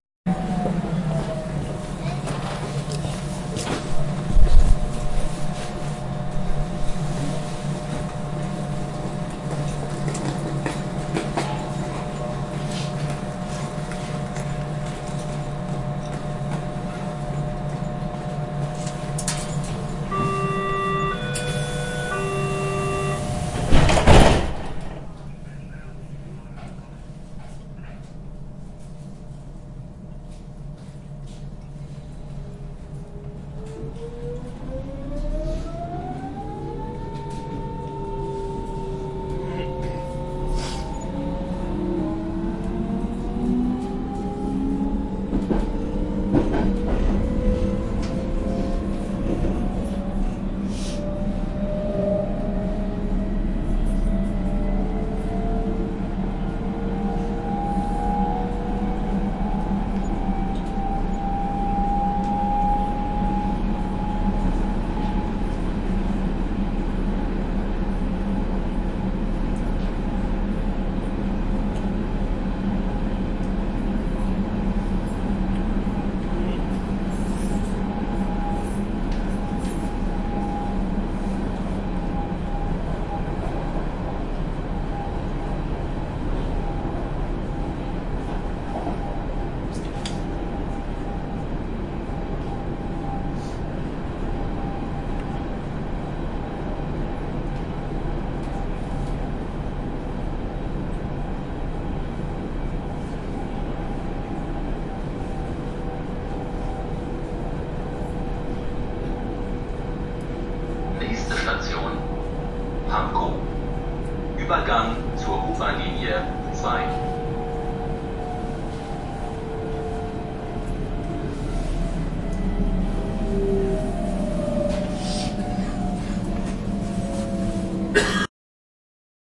U-Bahn Journey day
Underground train journey.
foley, travel, transport